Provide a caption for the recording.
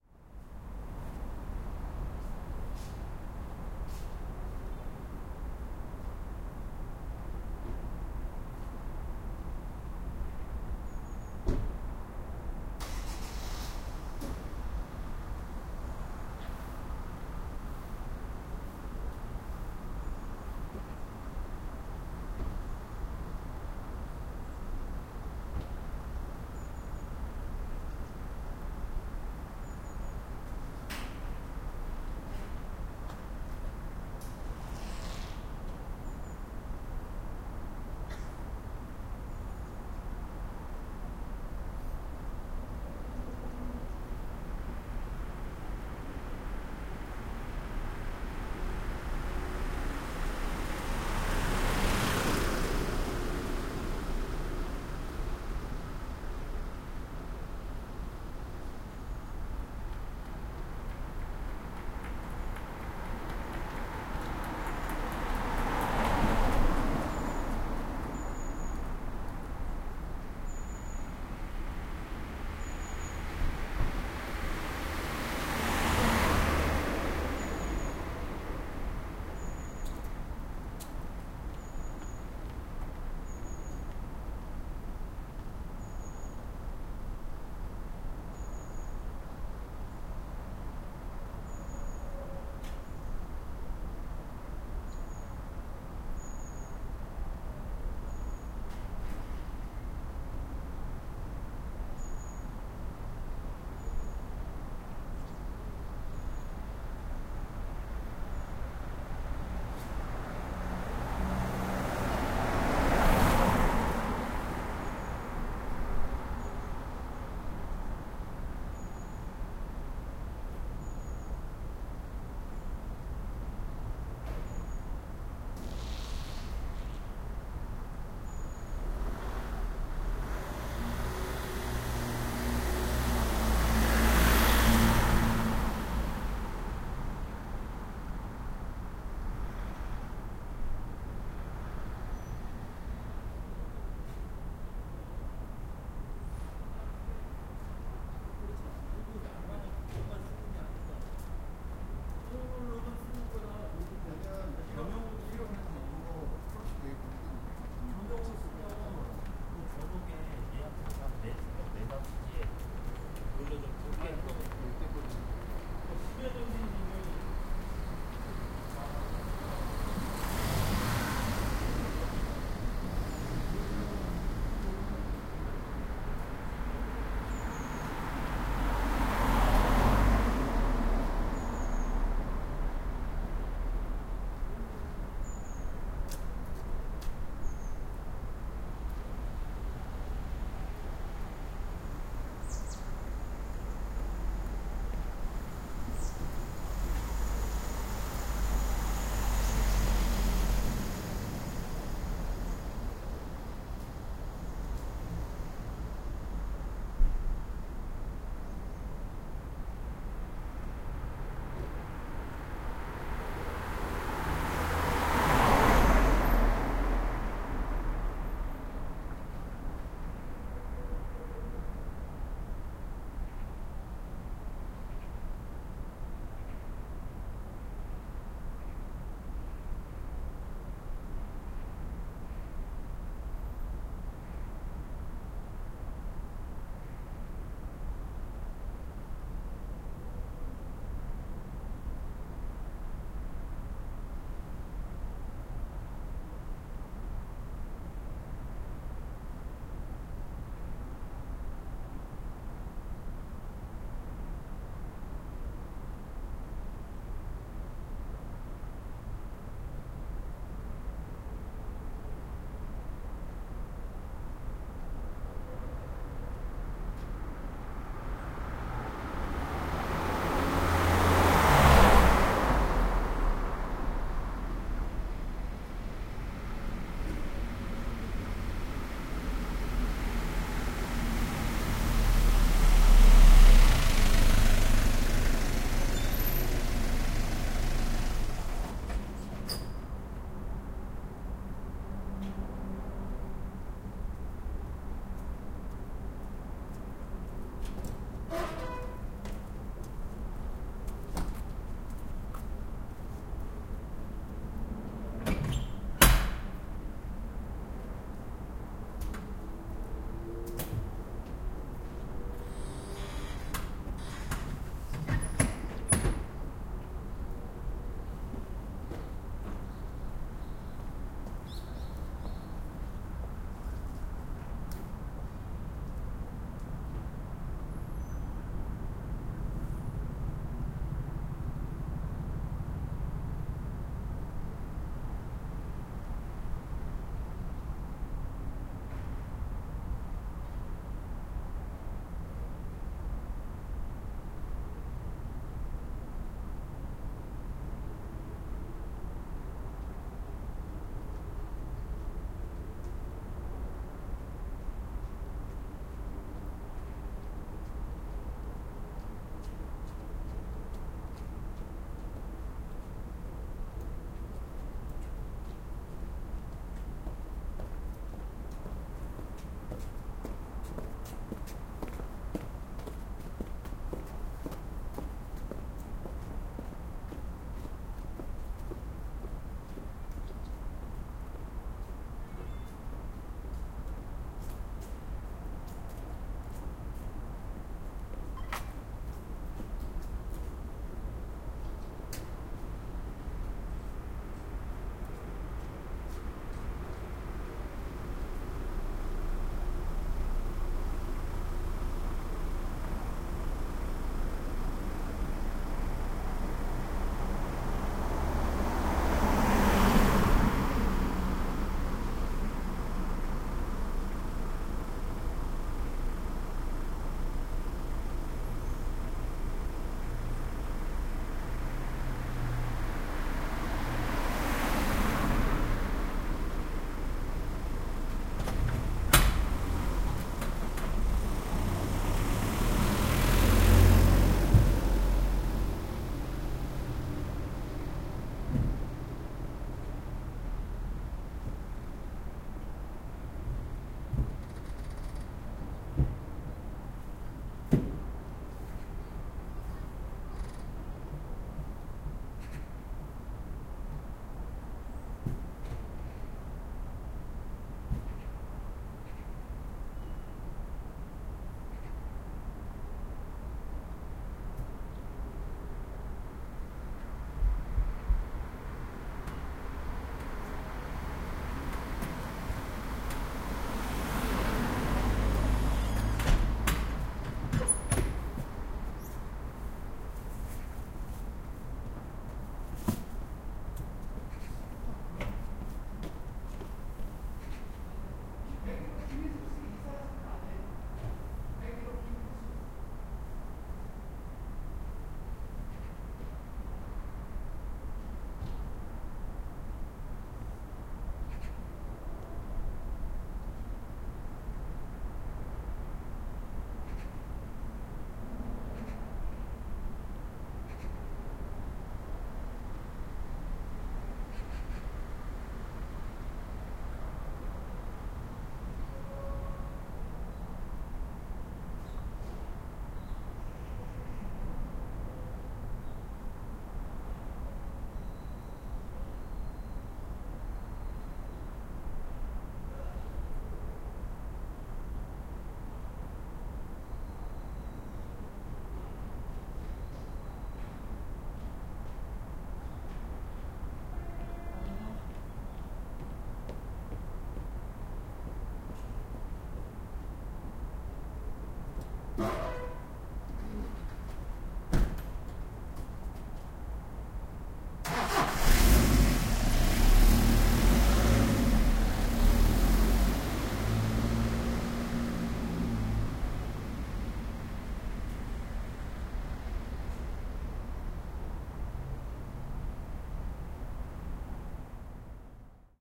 0047 Quite street birds and some cars
Quiet street, birds, some cars and trucks, some people
20120116
cars, korea, seoul, traffic, truck, voice